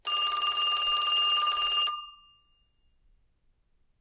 Phone Ringing #4
phone, ring, noise, ringing